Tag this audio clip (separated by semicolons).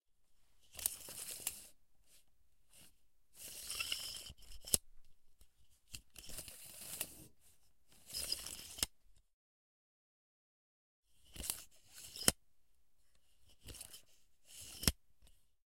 CZ; Czech; meter; Panska; Pansk